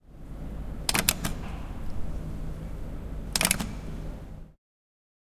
STE-022 Lighter Switch Fast
Switching on and off the lights of a classroom quickly.
campus-upf, lights, switch, UPF-CS12